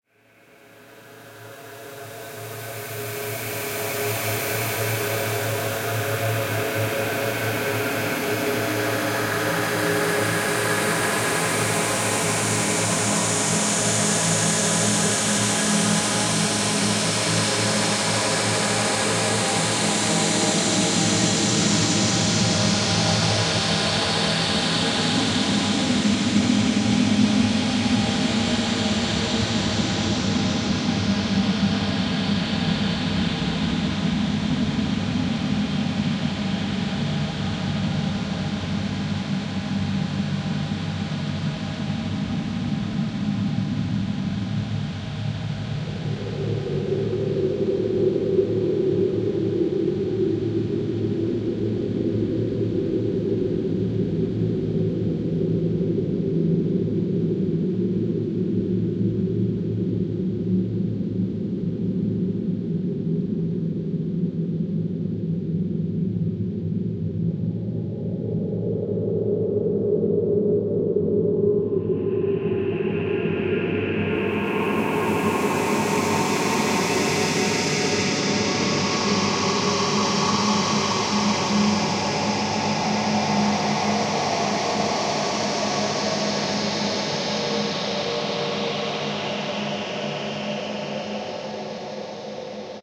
Dark Atmosphere
A dark ambience, probably of some non-existent tunnel or metro.
Notes coming from Carbon 2 (Reaktor) and stretched and pitch-shifted by Paulstretch.
airy, ambience, ambient, atmosphere, black, breath, cold, dark, industrial, long, metro, paulstretch, suspenseful, synth, synthetic, tunnel